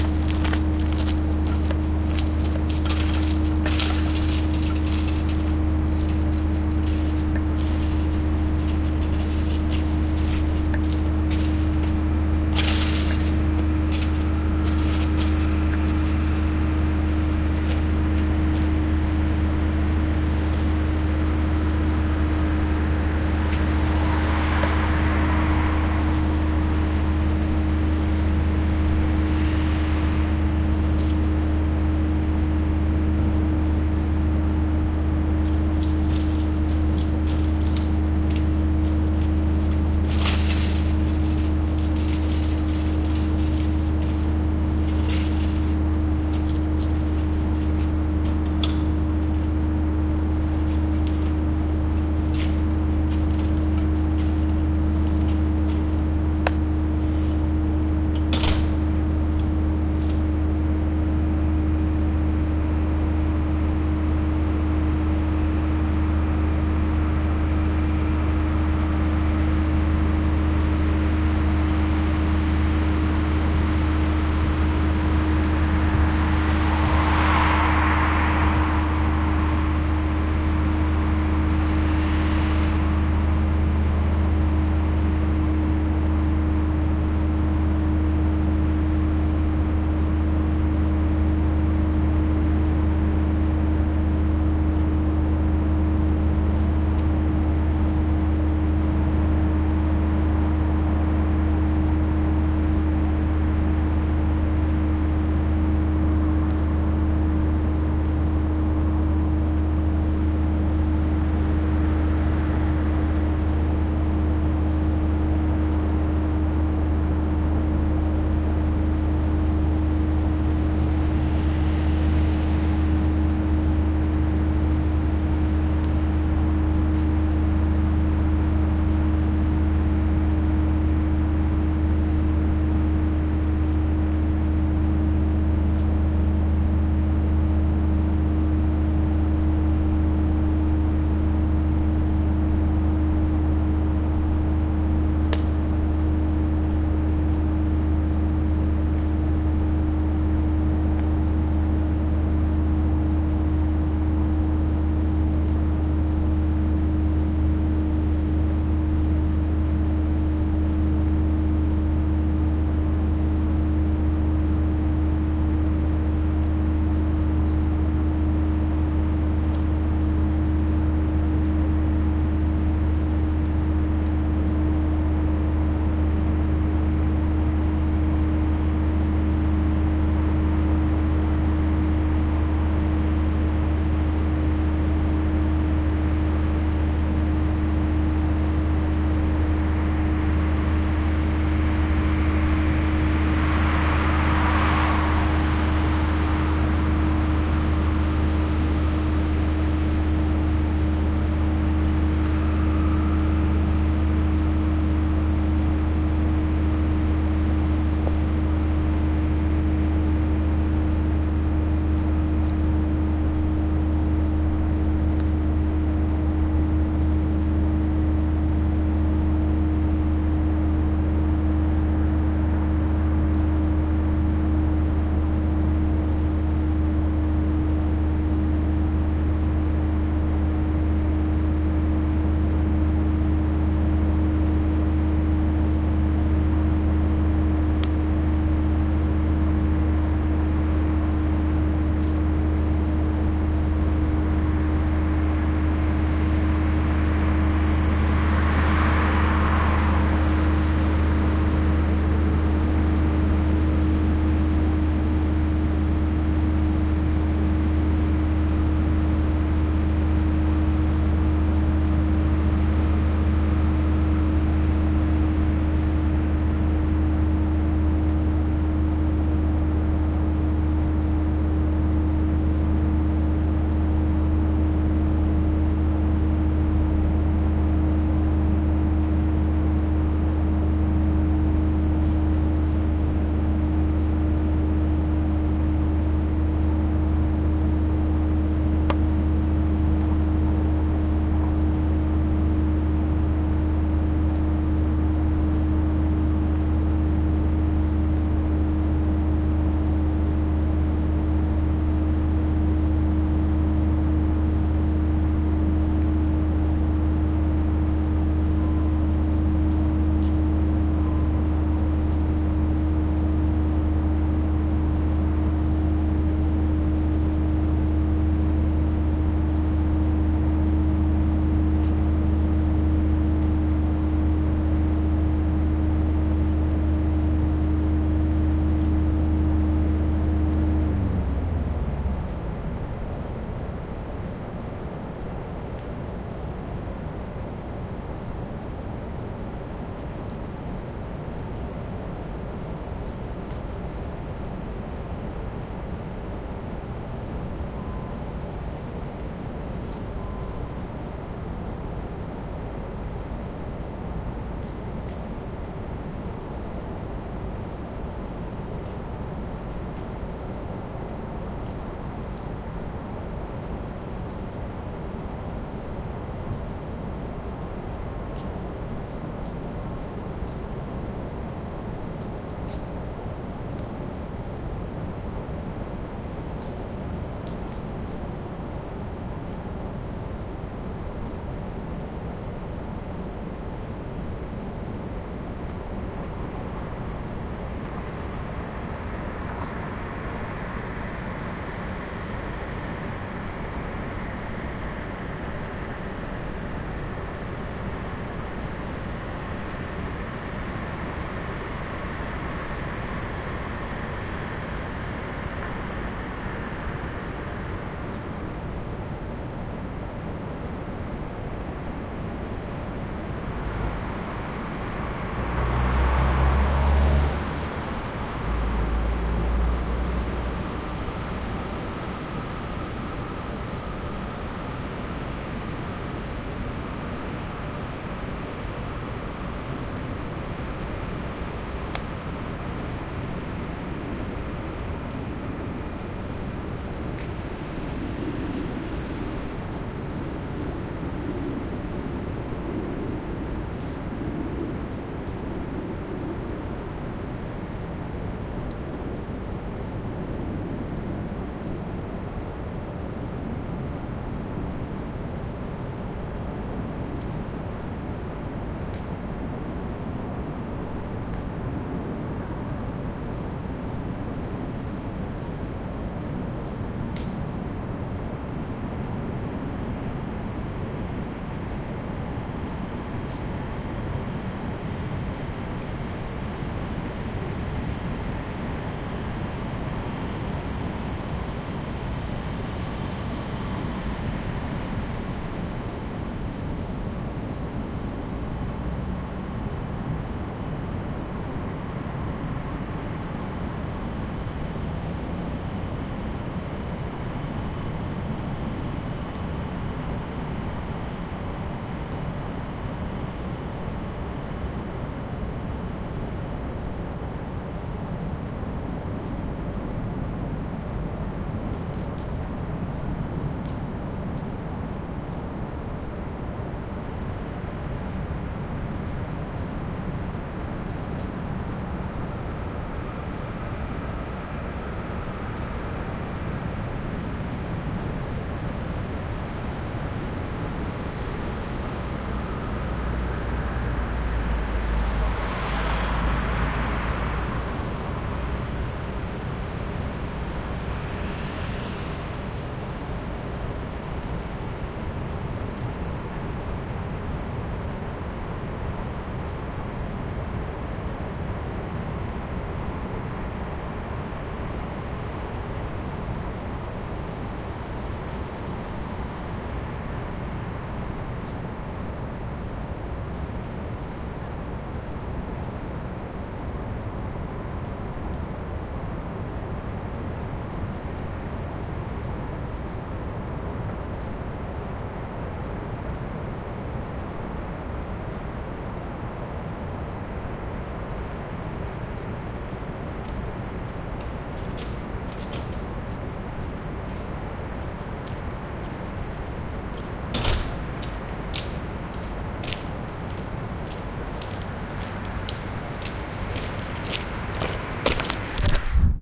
Maastricht Industrial Estate with Nature at Night
Recorded in Maastricht, Limburg, Netherlands on January 8th 2021, around 23:00 (11PM). On an industrial estate. You can hear a factory humming in the first half of the recording, after that you hear the factory process shutting off. Furthermore, there is traffic and other noise (probably the sound of trees and some animals).
Zoom H4 stereo recording.